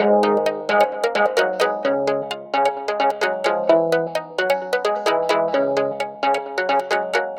130BPM
Ebm
16 beats
Logic, Synth, Sculpture